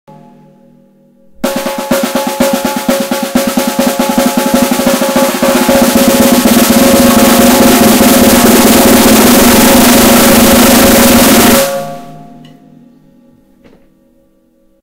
550 Sonic Drum Roll
The new version of the Sonic Drum Roll sound effect.
I was permanently deleting the old sound effect because of my new album "999 Gaming Sound Effects for Editing" - here is the older version
Sound ID is: 593618
drum, Loonerworld